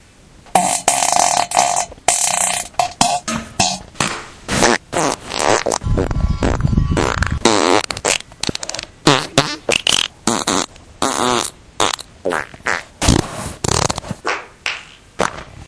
many farts
explosion, noise, flatulation, frogs, aliens, car, frog, poot, computer, fart, snore, race, beat, flatulence, laser, space, nascar, ship, gas